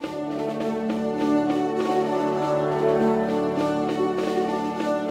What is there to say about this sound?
simple-orchestra-fragment
Audacity, polyphonic, mono